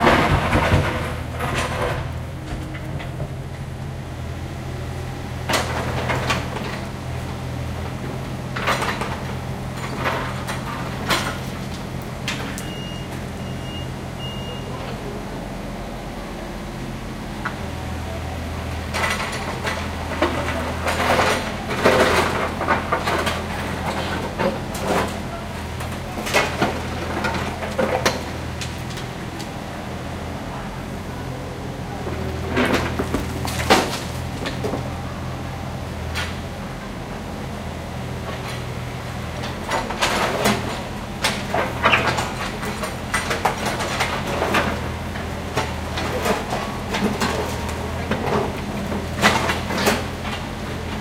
Japan Matsudo 5thFloor AsphaltTruck
At 1:00AM (night) I heard some construction works going on down below. I recorded this from the 5th floor of a former love hotel in Matsudo, Chiba prefecture, Japan (just east of Tokyo). Heavy pieces of asphalt, broken from the street and lifted up into the a container truck. In one night they repaired the asphalt of a complete 100M long street.
Zoom H2n MS-stereo recording.
asphalt, breaker, bricks, Chiba, concrete, construction, construction-site, demolish, demolition, engine, excavator, field-recording, hacking, heavy, heavy-material, industrial, jack-hammer, Japan, Japanese, loading, machine, machinery, Matsudo, midnight, night, rumbling, shards, truck, unloading